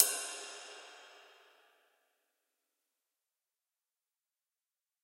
Sampled from a 20 inch K. Zildjian Istanbul ride from the 1950s, and subsequently modified by master cymbal smith Mike Skiba for a final weight of 2220 grams. Recorded with stereo PM mics.This is a stick tap on the bow of the cymbal, and can be layered with the "wash" sample to produce hits of varying strengths or velocities.